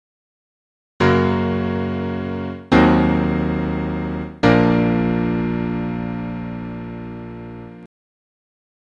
Piano Ending Tune
That cliché sound that you hear at the end of old radio shows. I have this one in Church Organ, Piano, Organ, and Strings sounds.
cliche, piano, music, clich, end, tune